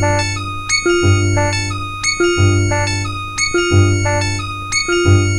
9oBpM FLoWErS Evil Creams - 3
A very strange loop with xylophone and synth brass. Loopable @90bpm.
90bpm, experimental, loop, novelty, weird